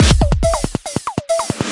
140bpm Jovica's Witness 1 6
140bpm, electro, experimental, jovica, weird